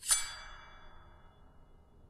Blade Draw
An unrealistic but neat blade effect made with a pizza cutter and pocket knife.
Sword, Draw, Fantasy, Draws, Swords, Medieval, Blade